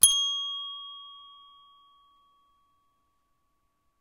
A desk bell being rung. Recorded with Zoom H1n.